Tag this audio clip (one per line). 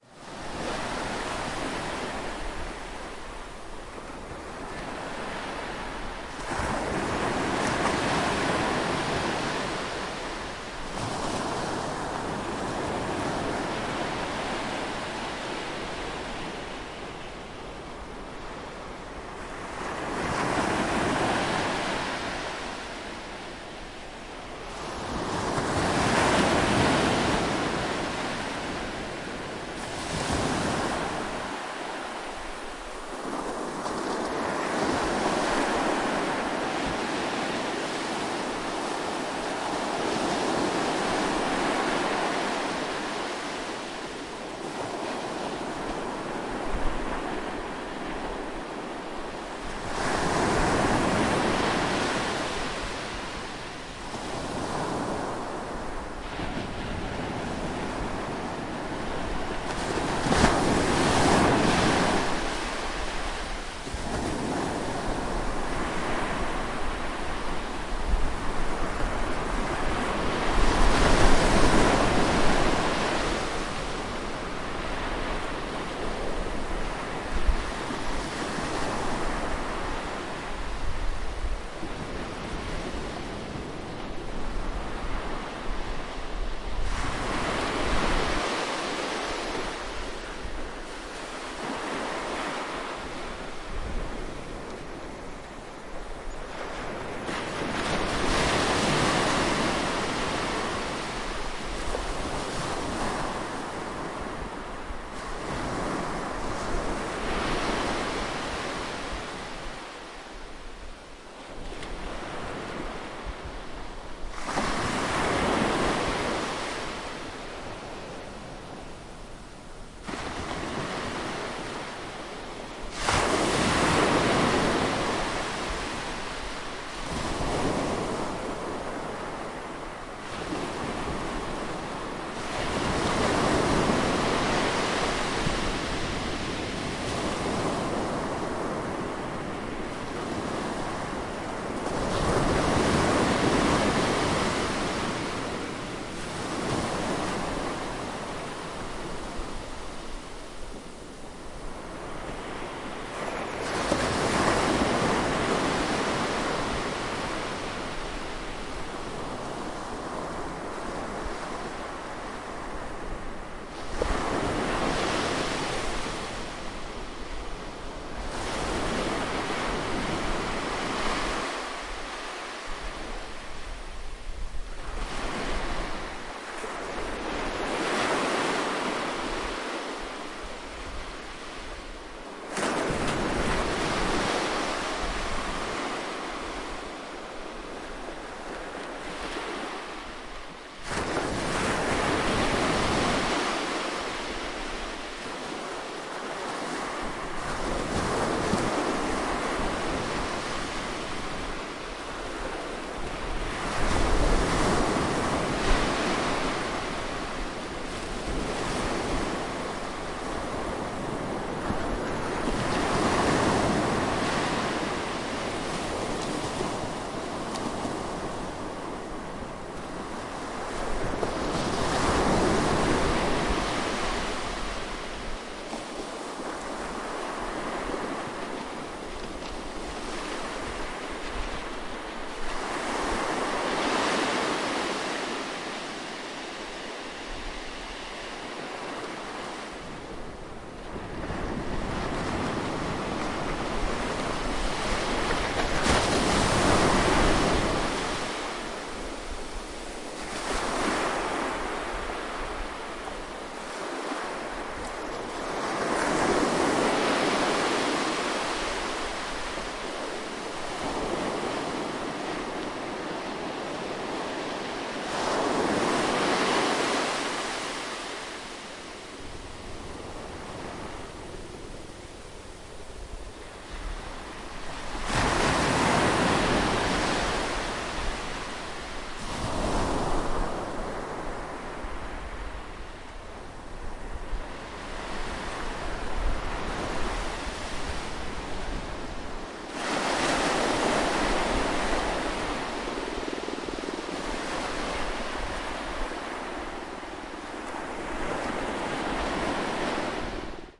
ambience
beach
close
coast
ocean
sea
seaside
shore
side
water
wave
waves